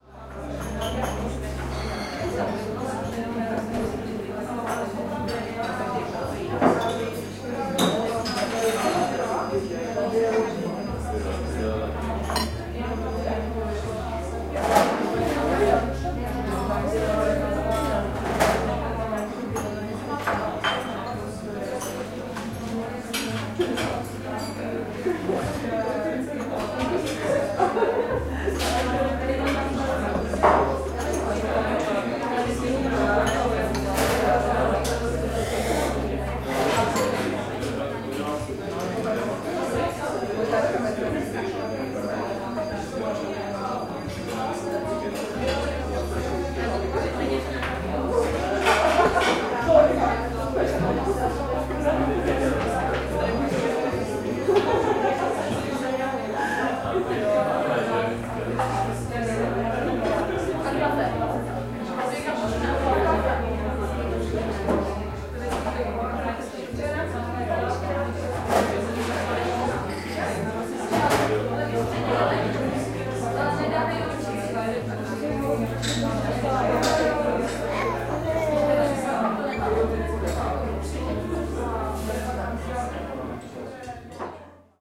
Jazzy café ambience
Very eased atmosphere in a busy café in Brno, CZR. Jazz music in the background, people talking, mugs tinkling, coffee making...
In case you use any of my sounds, I will be happy to be informed, although it is not necessary.
ambiance, ambience, ambient, atmosphere, background, background-sound, bar, brno, caf, chatter, field-recording, people, restaurant, talk, talking